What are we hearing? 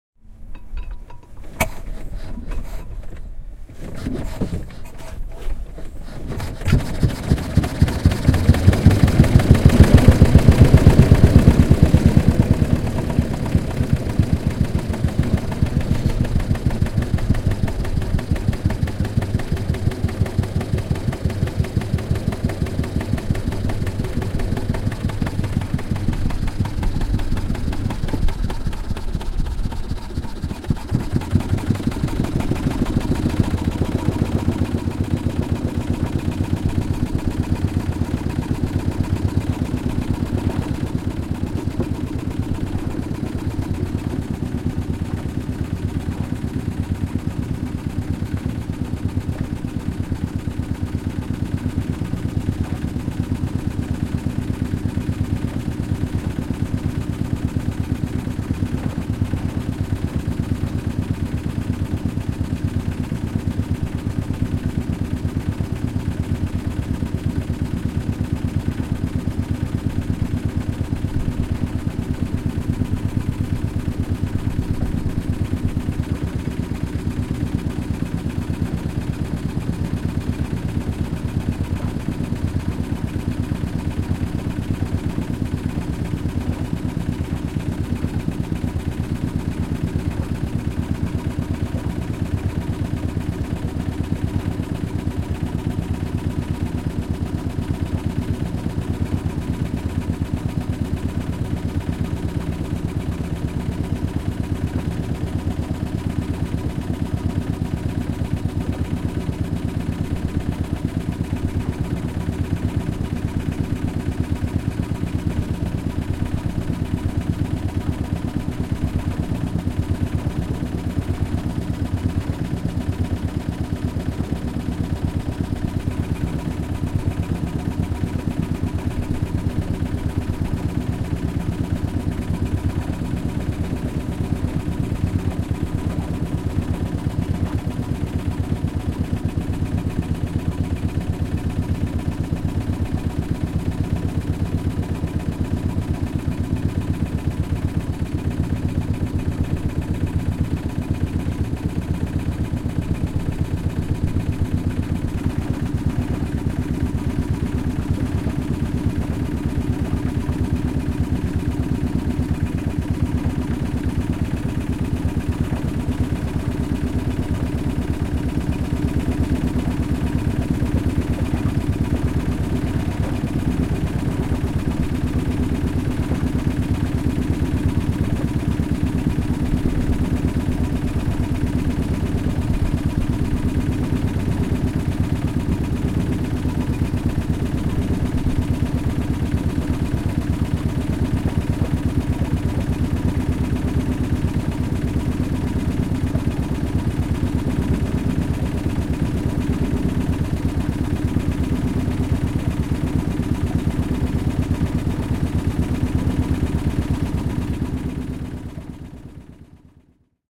Kalastusvene, ajoa / Fishing boat running, wooden, 1-cylinder Wickström marine engine. Start and run, rec near the motor.
Puinen vene, keskimoottori Wickström, 1-sylinterinen. Käynnistys, tasaista ajoa mukana veneessä lähellä moottoria, putputusta.
Paikka/Place: Suomi / Finland / Kemiönsaari, Rosala
Aika/Date: 12.07.1990
Kalastus, Finnish-Broadcasting-Company, Soundfx, Vene, Finland, Boating, Vesiliikenne, Yleisradio, Yle, Fishing, Tehosteet, Field-Recording, Veneily, Boat